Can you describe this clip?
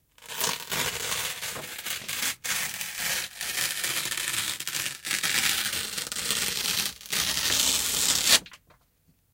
tearing a piece of paper